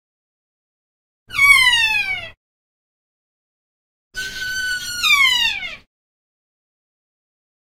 Kea (New Zealand parrot)
Another mournful cry from a New Zealand bird.
kea, zealand, birdsong, birdcall, parrot, native, new, bird